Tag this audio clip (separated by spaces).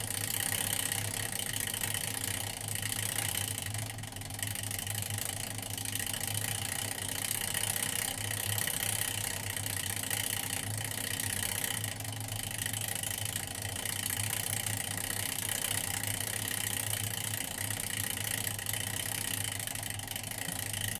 tac time clatter ticks tic-tac tick ticking tic Toaster tostapane ticchettio clock